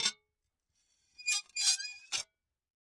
Small glass plates being scraped against each other. Rougher high pitched sound. Close miked with Rode NT-5s in X-Y configuration. Trimmed, DC removed, and normalized to -6 dB.